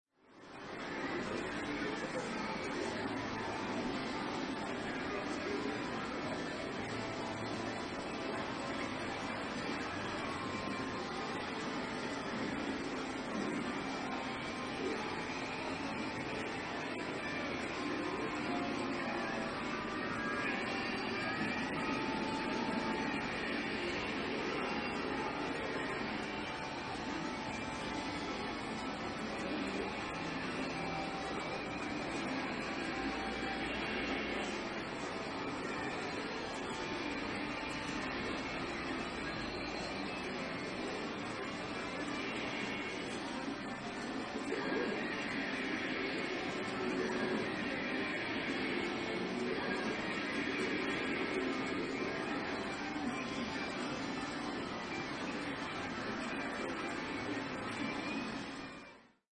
This is a 4 mic recording taken inside a pachinko parlor in Akasaka, Tokyo. There are so many loud machines and the background music is so loud that you can hardly make out individual sounds and the entire space is just a wash of noise. If you listen closely to this one you can hear some of the individual balls dropping. (When you play pachinko, you win metal balls which can be exchanged for cash later.)